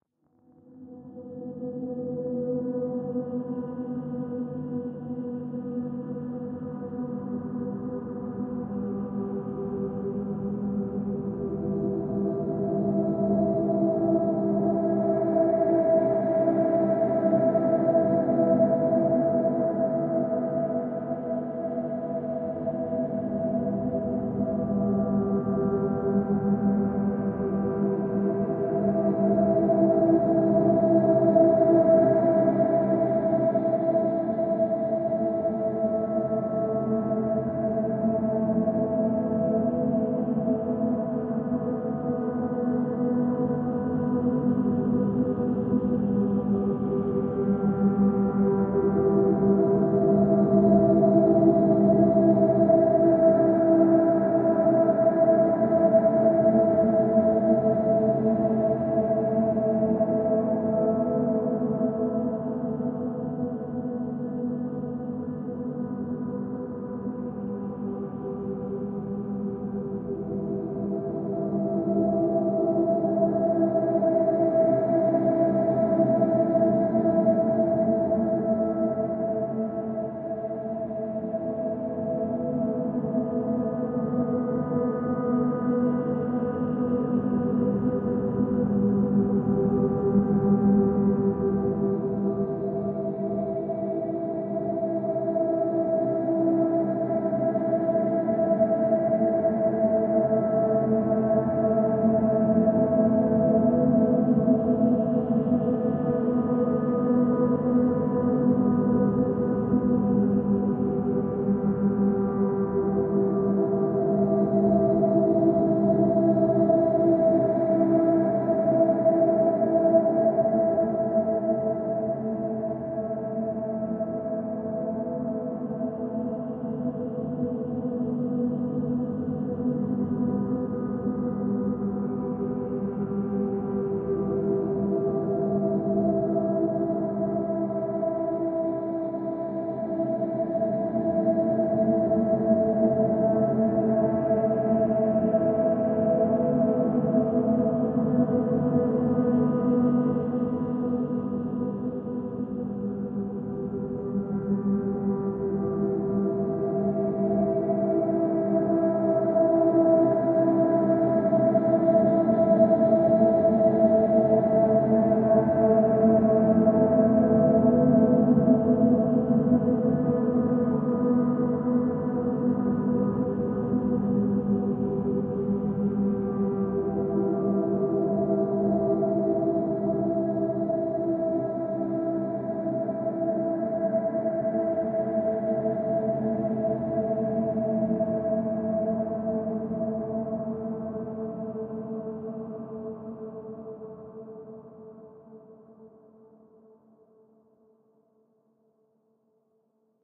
SCI-FI SURVIVAL DREAMSCAPE
APOCALYPSE - SURVIVAL - LOADING SCREEN MUSIC
[1] This loop was Made from scratch In Fl studio.
[3] Comment for more sounds like this!
Feedback is appreciated! Make sure to credit and send me if you end up using this in a project :)!
walking, last, spring, music, Loading, us, Dreamscape, fi, minecraft, sci, ambient, survival, dead, ambiance, screen